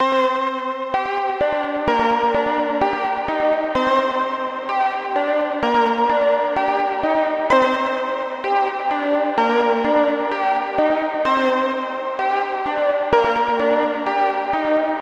outta space! 128 bpm
Modulated ambient synth 128 bpm
Nothing really...still tryna find it
ambiance, ambient, Dreamscape, Elementary, loop, Trap